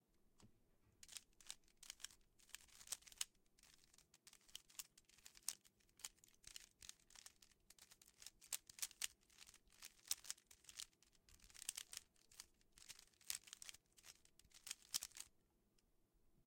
twisting of a rubix cube
twist, cube, rubix